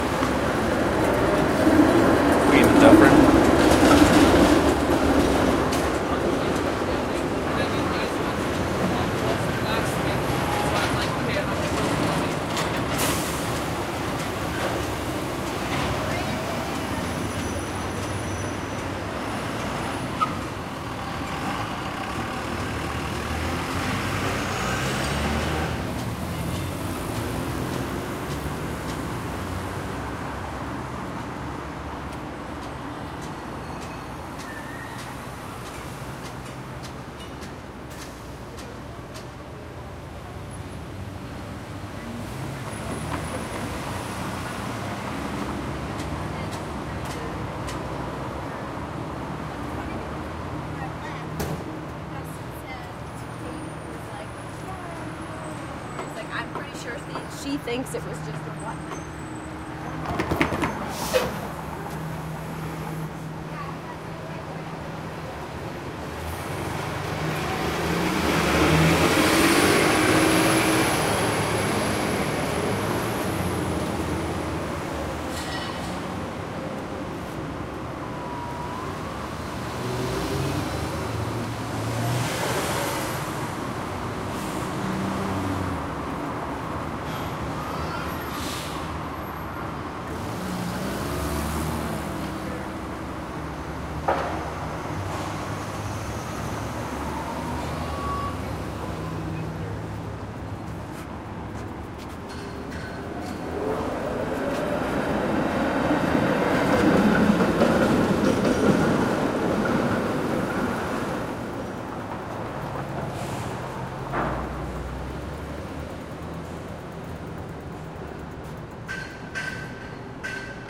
Traffic Queen and Dufferin
recorded on a Sony PCM D50
xy pattern